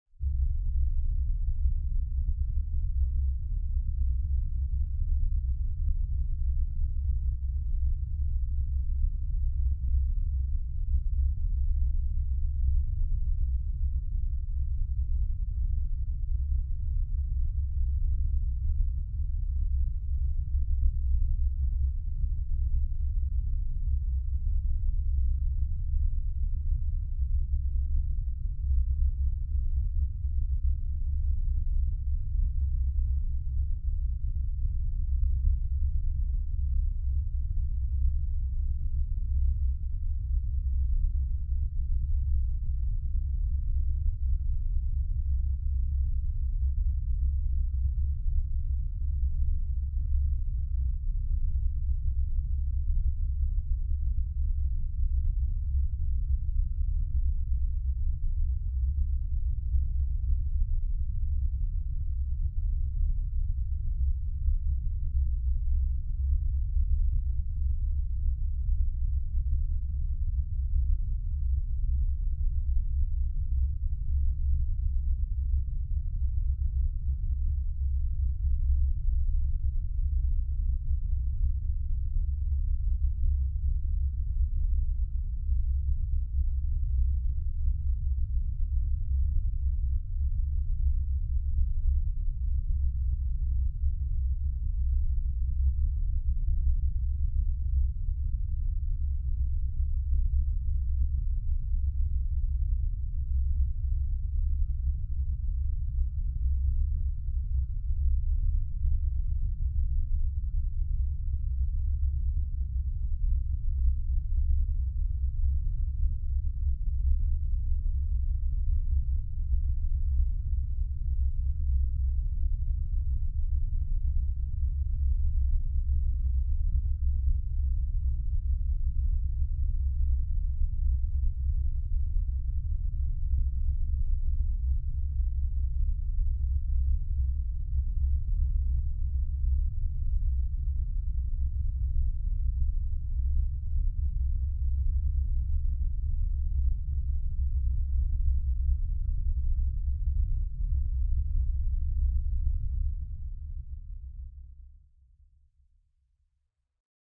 Deep Space Ambiance
Alien, Ambiance, Deep, Electronic, Futuristic, Futuristic-Machines, Landing, Machines, Mechanical, Noise, Sci-fi, Space, Spacecraft, Spaceship, Take-off, UFO